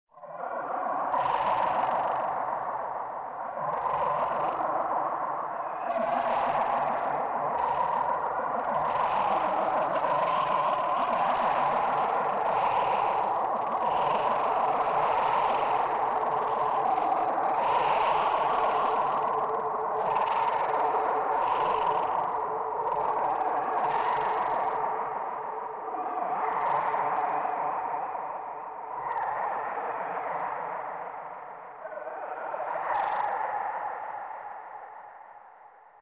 hypnotic line
A flowerloop remix:
This is a rather soft sound with a chill tone. Here I used primarily reverb.